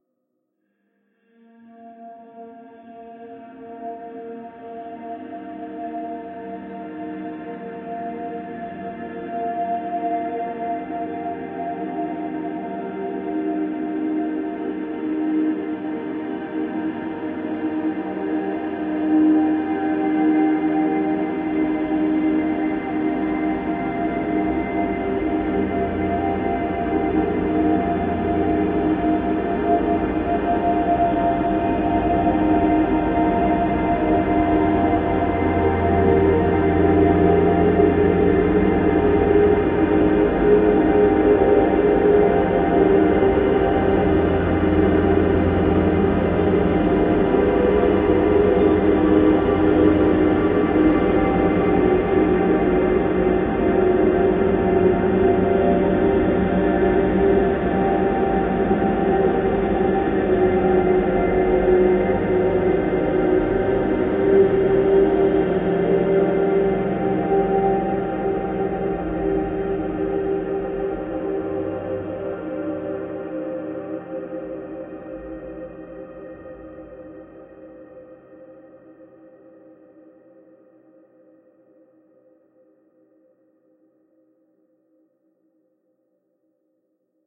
LAYERS 010 - Dreamdrone-46
LAYERS 010 - Dreamdrone is an extensive multisample package containing 108 samples. The numbers are equivalent to chromatic key assignment. The sound of Dreamdrone is already in the name: a long (over 90 seconds!) slowly evolving dreamy ambient drone pad with a lot of movement suitable for lovely background atmospheres that can be played as a PAD sound in your favourite sampler. Think Steve Roach or Vidna Obmana and you know what this multisample sounds like. It was created using NI Kontakt 4 within Cubase 5 and a lot of convolution (Voxengo's Pristine Space is my favourite) as well as some reverb from u-he: Uhbik-A. To maximise the sound excellent mastering plugins were used from Roger Nichols: Finis & D4.
ambient, artificial, dreamy, drone, evolving, multisample, pad, smooth, soundscape